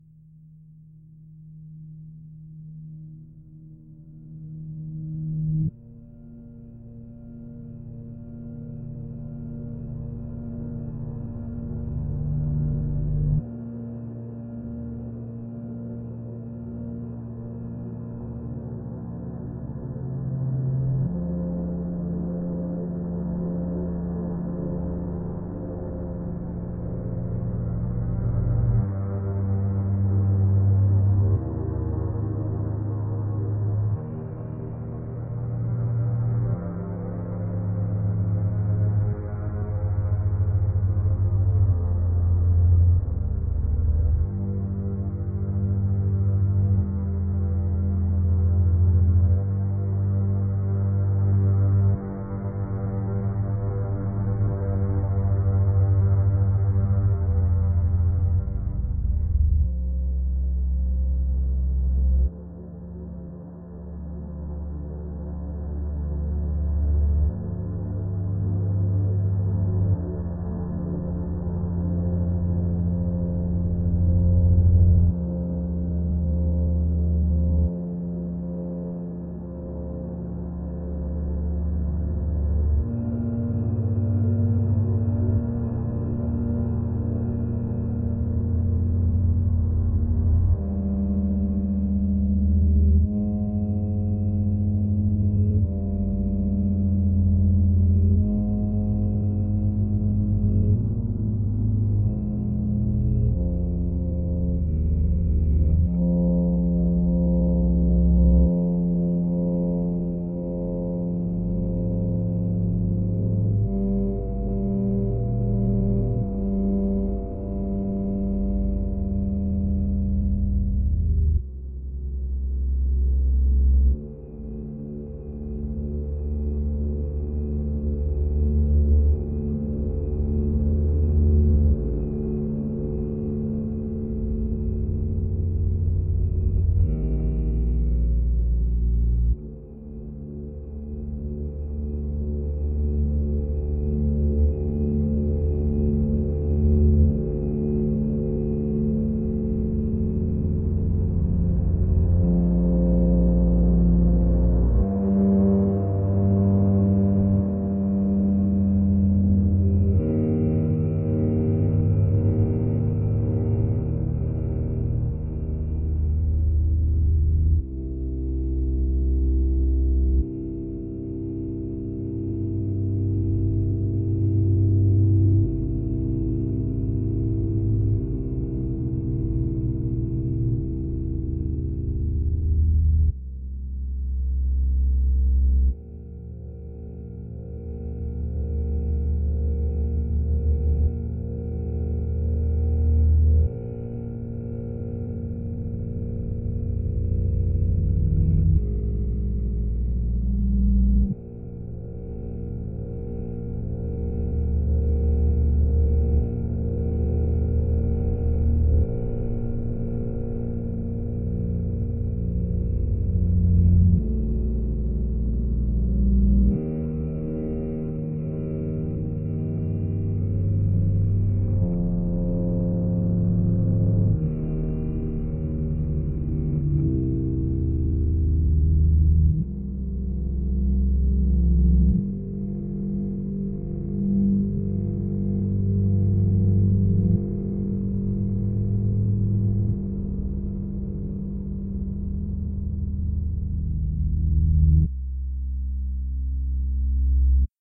a space sounding ambient sound backwards
Game, Fi, Sci, Sound